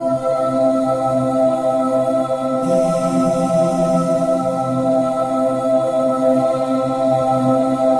Short piece of music to be played on background to show something scary.
ambience, atmosphere, dark